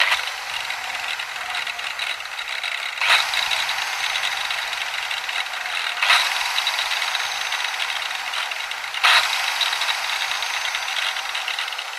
Angle grinder - Fein 230mm - Ignition 4 times
Fein angle grinder 230mm (electric) turned on four times and slowing down.
4bar, 80bpm, crafts, fein, ignition, industrial, labor, machine, metalwork, motor, tools, work